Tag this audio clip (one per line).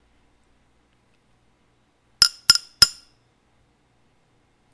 glass
clanking